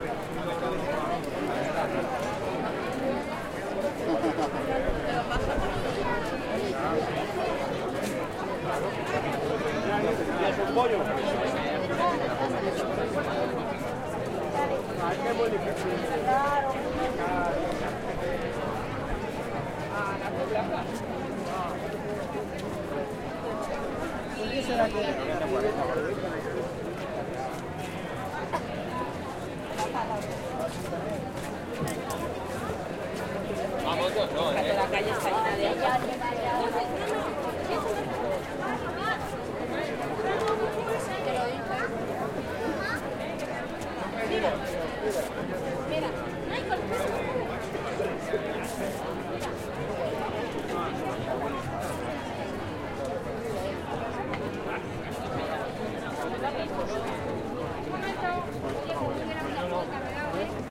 Feria, multitud, ciudad
multitud, people, town, city, personas, street, feria, ciudad